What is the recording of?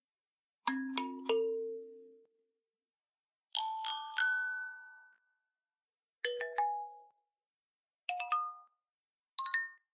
kalimba - simple effect
5 sounds - the first is original, the next 4 are edited sounds.
If you want to use this sound, you have to cut out the section you like.
Instrument - cheap, simple kalimba.
recorded ... by phone :P (xiaomi A2 litle)
Edited in Audacity.